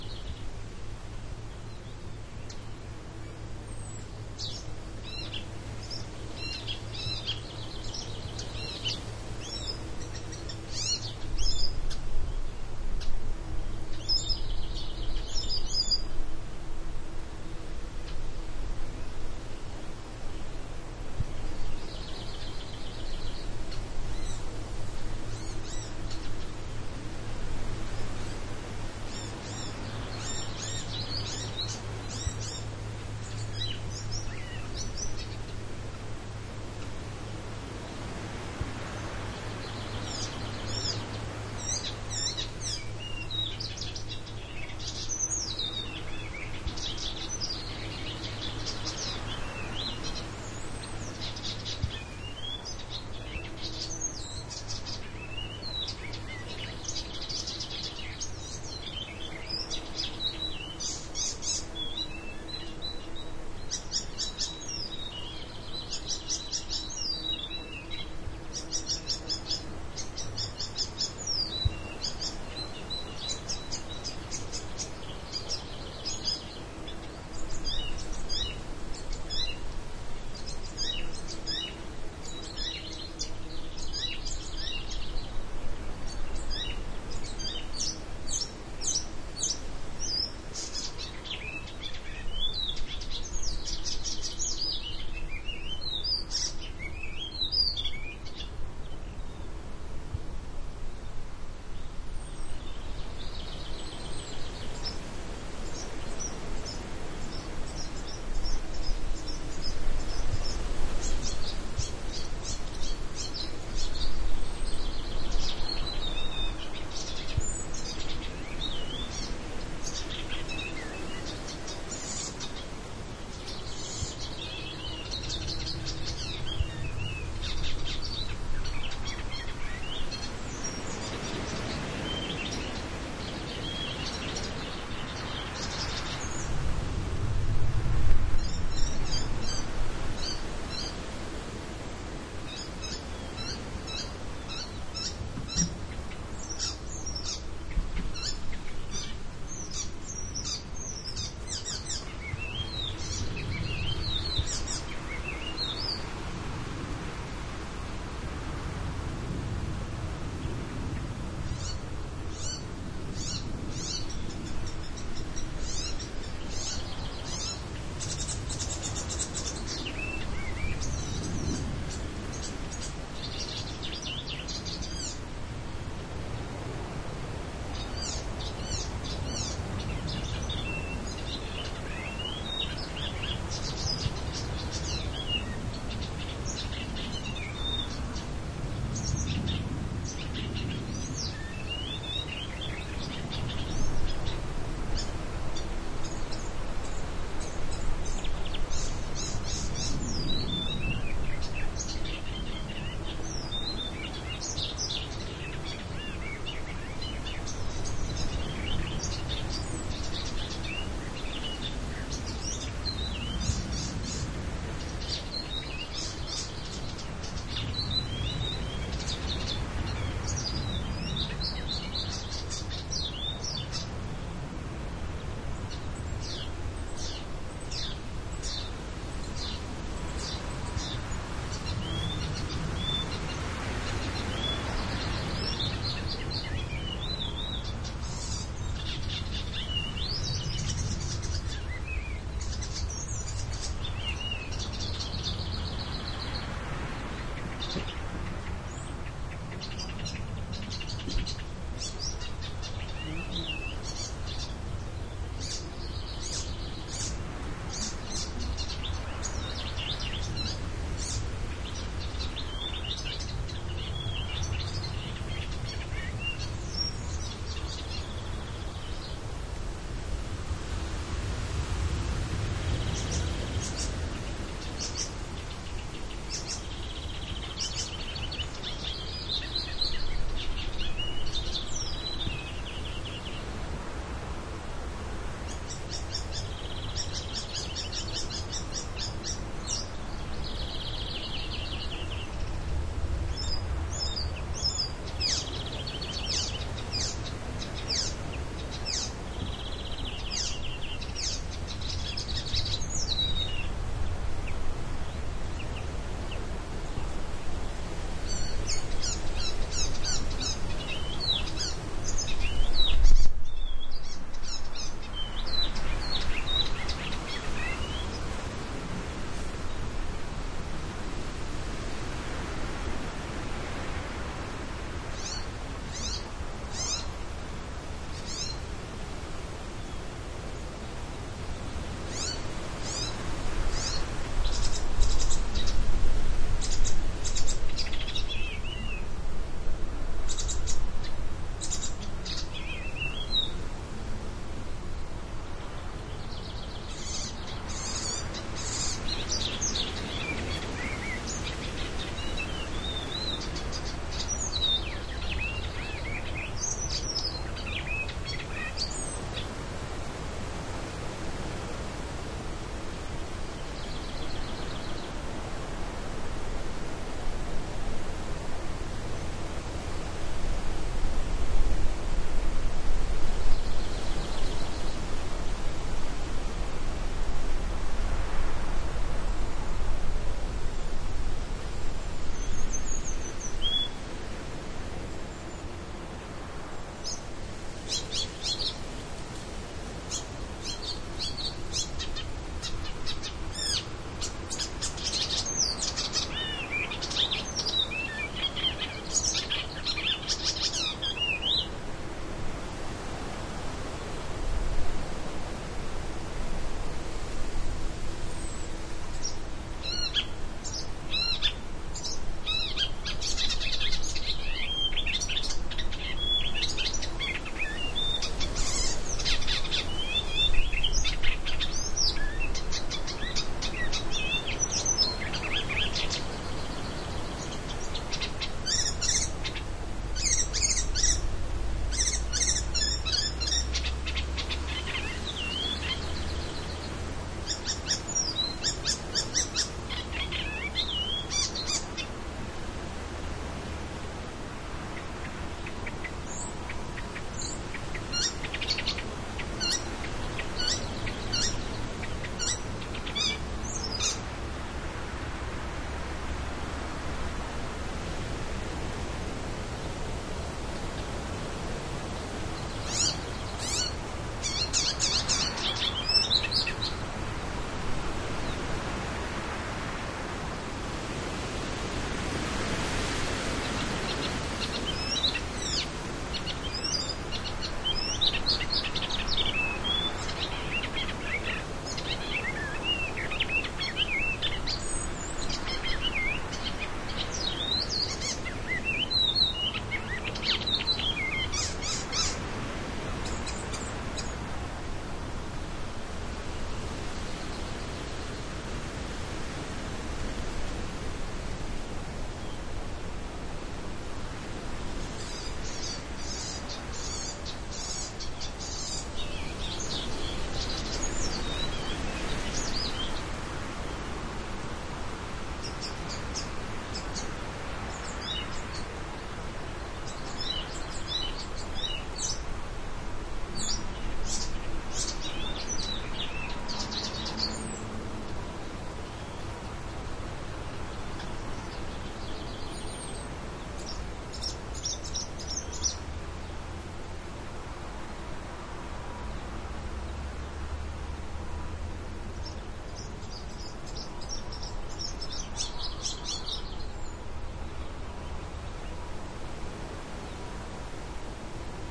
windy garden
Could it be a siskin, singing there? I have no idea. Recorded on a windy day near the westcoast of Denmark. I placed those microphones near the tree, in which the bird was singing. AT3032 microphones, FP-24 preamp and R-09HR recorder.
denmark, stormy, wind, garden, jutland, storm, field-recording, birds